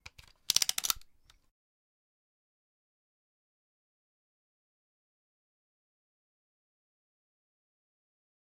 OWI Leveraction
lever action rifle cocking
shotgun, rifle, cowboy, western, cocking, lever-action